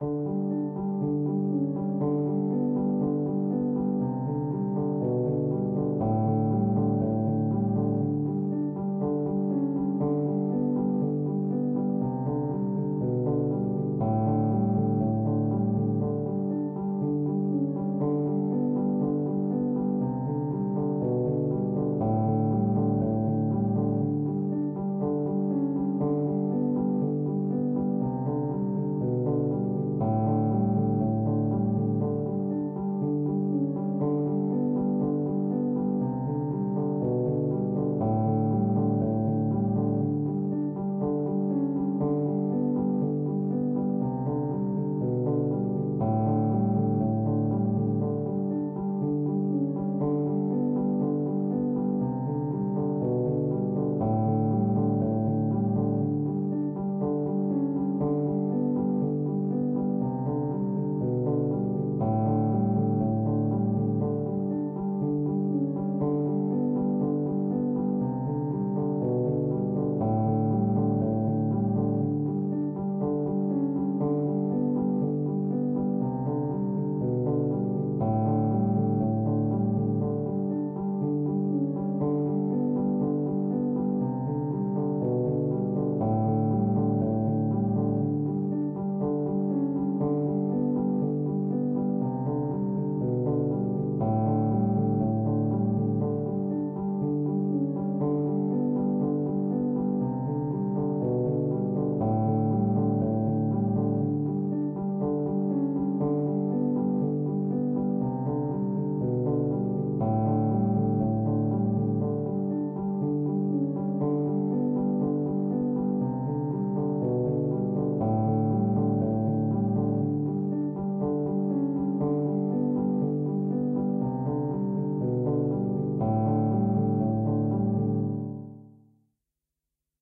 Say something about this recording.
Piano loops 059 octave down long loop 120 bpm
samples, 120bpm, simplesamples, free, music, 120, bpm, reverb, pianomusic, simple, Piano, loop